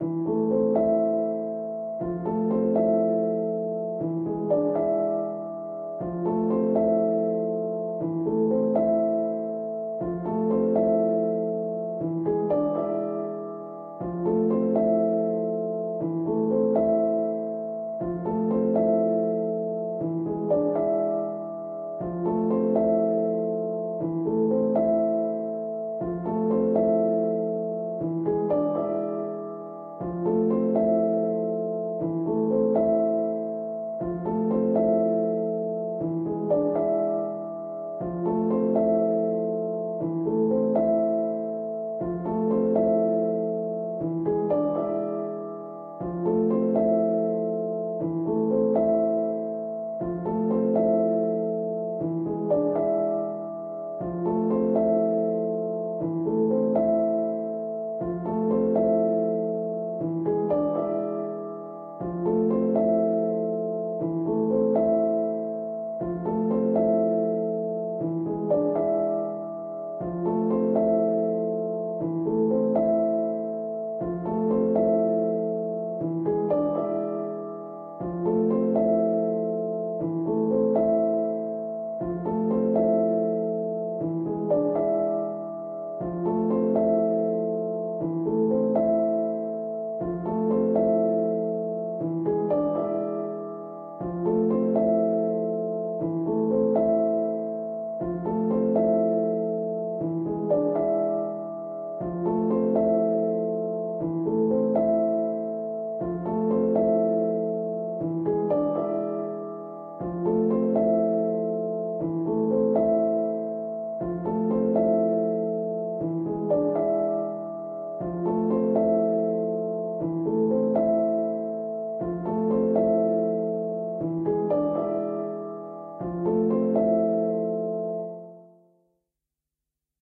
Piano loops 043 octave long loop 120 bpm
120, bpm, free, loop, music, Piano, reverb, samples, simple, simplesamples